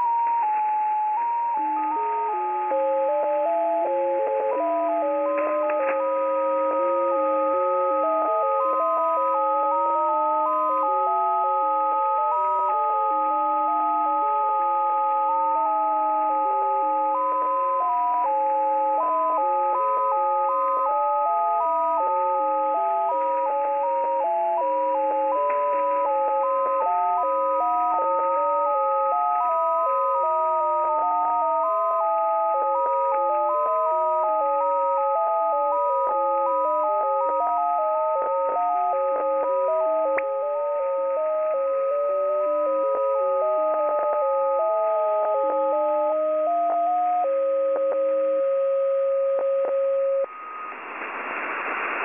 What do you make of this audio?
Double Melodies
Two melodies from 14077 on shortwave. No idea what it is. Picked up and recorded with Twente university's online radio receiver.
14077, creepy, encrypted-content, melody, music, mysterious, mystery, numbers-station, radio, shortwave, static, the-14077-project, tones